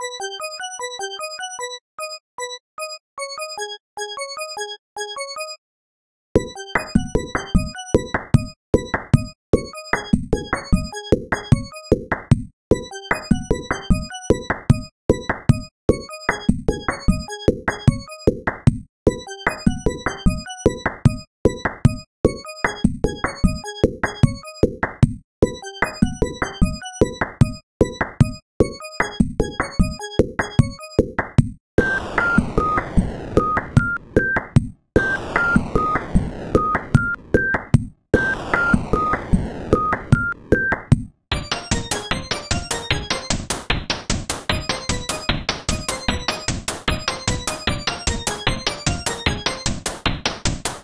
electronic loop chiptune background game